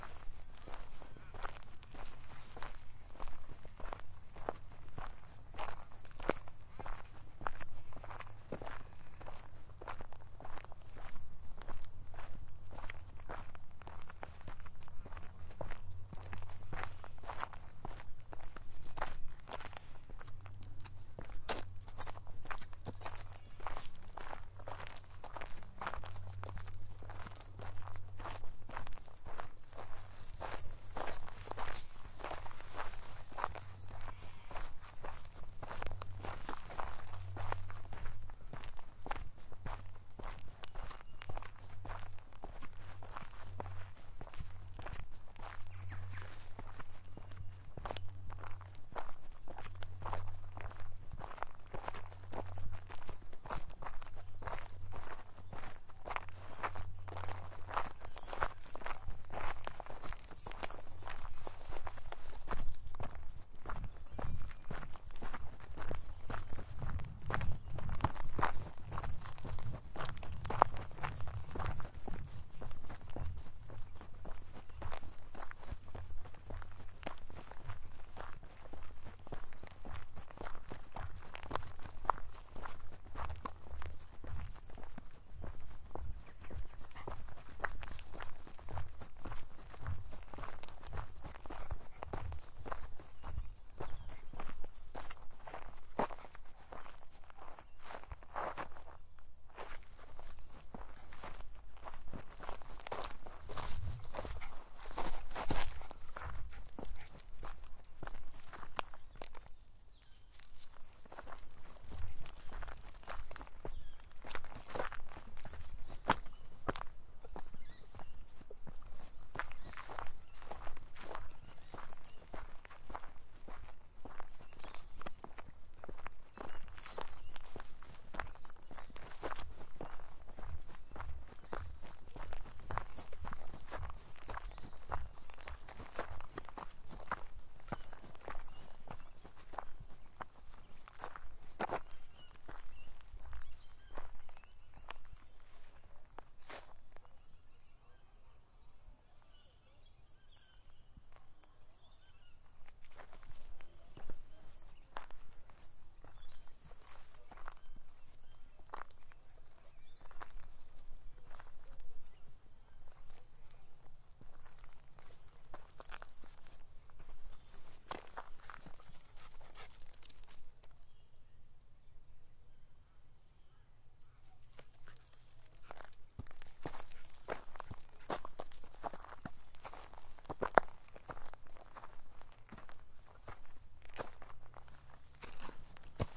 Bush Atmos 04 - Walking
Walking through a bush / forest.